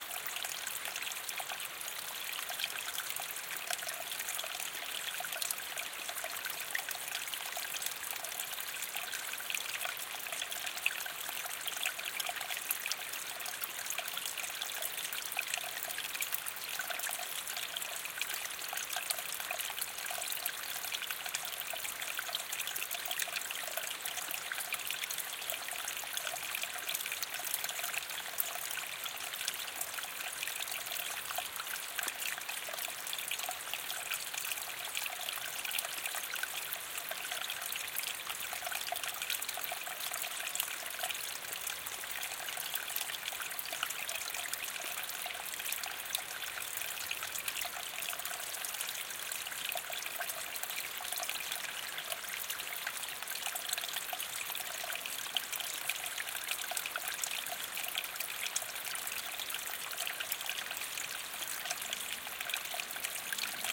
Murmuring, babbling, burbling and brawling brooks in the Black Forest, Germany.OKM binaurals with preamp into Marantz PMD 671.
brook, creek, flowing, forest, water